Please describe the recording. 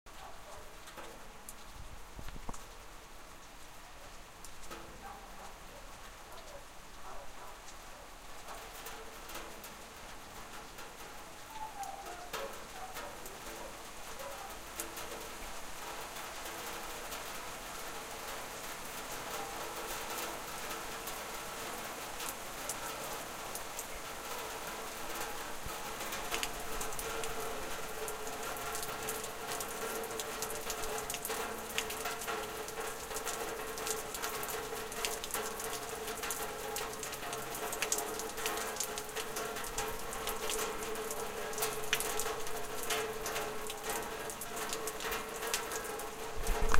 Light RainTo Strong RainLeichterregenwirdstarkereregen
Light, RainLeichterregenwirdstarkereregen, RainTo, Strong